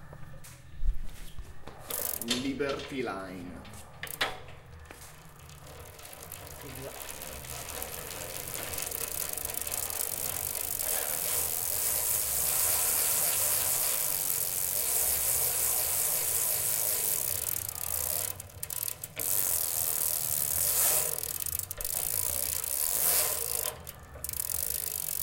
liberty line bike air
Human Bike Sound Archive.
Recording session of a DELMA "Liberty line" model.
bell, bicycle, bike, cycle, horn, mechanic, metallic